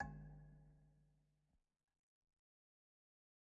Metal Timbale 001
trash, timbale, home, record, god, drum, pack, kit